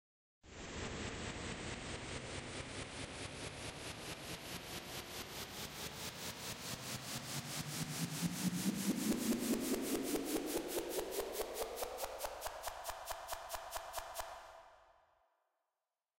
This is a crazy buildup sweep I made in FL studio using some effects
snare-roll, sweep, buildup